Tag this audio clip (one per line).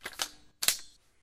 airsoft
aug
click
gun
load
magazine
metal
reload
rifle